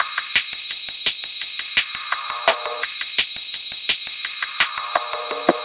kuzman909 pss 190 rocknroll beat through sh 101 filter rwrk
i just speed up the beat, edited, filtered, compressed and gentle-distorted, it can be an useful hihat guideline for a drum and bass track. (strange: if i tag "d&b", the '&' is missed, it show 'd' and 'b' as individual tags)
hipass, drum, cutoff, dj, loop, guideline, filter, backline, breakbeat, tweak, hihat, break, upbeat, dnb, beat, club, remix, electro, soundesign, processing, hh, producer, drumloop